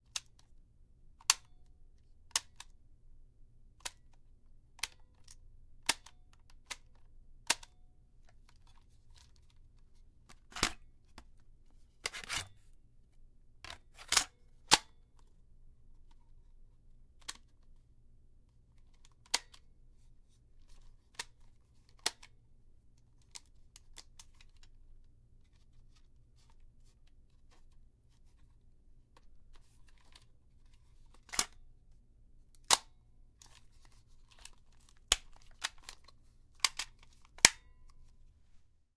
tape recorder buttons
All the sounds I could make using a small handheld tape recorder without batteries.
buttons, cassette, switch, tape